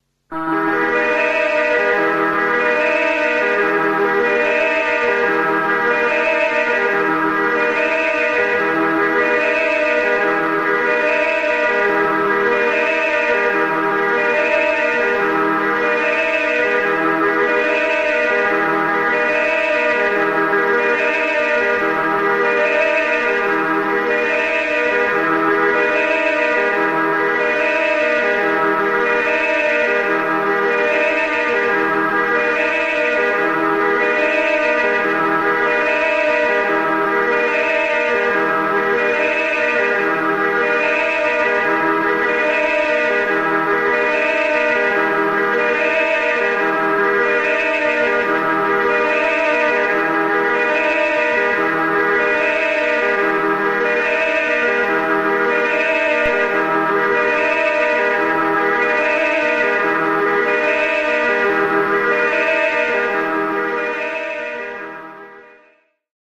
1 of 5 hypnotic drones. About 1 minute long each,quite loopable drones / riffs for all discerning dream sequences, acid trips and nuclear aftermaths.
instrumental, universe, electronic, loops, life, everything, downtempo, ambient, chill, drugs, acid, drone, nothing, synth, experimental